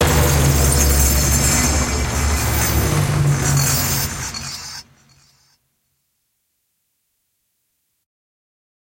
Sci Fi Hit
Sci-fi sound hit.
sound-design, impact, sci-fi, film, dark, cinematic